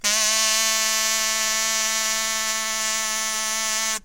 Ever wanted to incorporate the sound of a kazoo into your music but couldn't afford to buy one? Ever get frustrated considering the daunting task of years of kazoo lessons to master the instrument? Those days are over here is the sample pack you have been waiting for! Multisamples of a green plastic kazoo in front of a cheap Radio Shack clipon condenser. Load into your sampler and kazoo the night away! A note.
free, multisample, sample, kazoo, sound